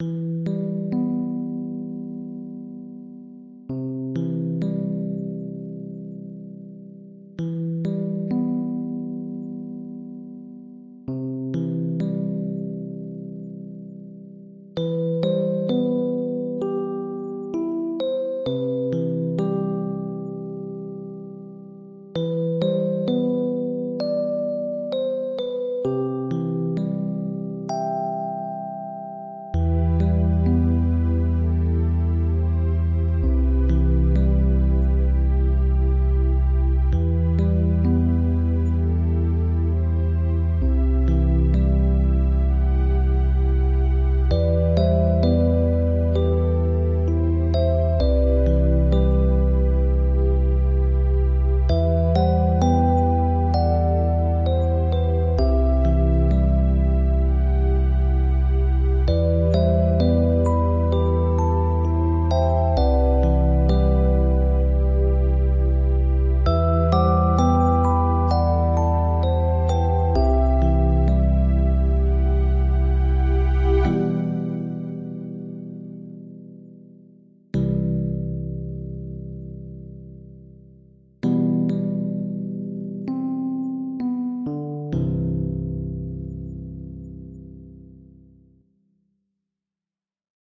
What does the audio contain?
Game Town/Room Music
ambience, game, music, room, rpg, town, warm